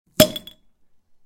opening the flip-top closure of a 1 litre beer bottle
recorded during the recording of a podcast
with a beyerdynamic dt 297 mk2 headset